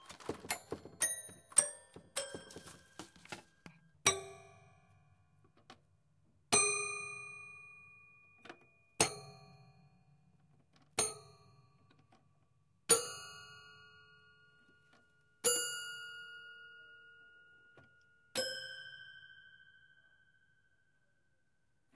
Banging away on an old toy piano in my grandmother's attic that is in a dire state of disrepair. I am uploading another recording where I attempted to get full, useful samples of each key.. many of them are stuck together and/or broken.
Recorder: Sony PCM-D50